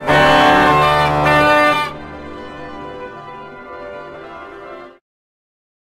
Reversed and Stretched Organ 02
Manipulated, Cathedral, Large, Music, Stretched, Reversed, Organ, Atmosphere, Hall, Close-Miked